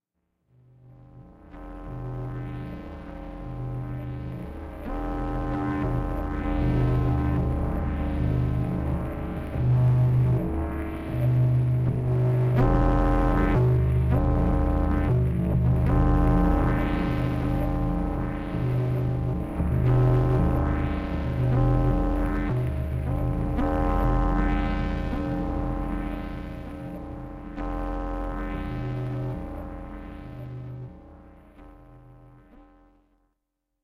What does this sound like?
Scifi Microbrute 14
From the series of scifi drones from an Arturia Microbrute, Roland SP-404SX and sometimes a Casio SK-1.
scifi, sci-fi, synthesizer, arturia, dronesoundtv, microbrute, drone